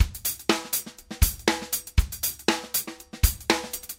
funk acoustic drum loops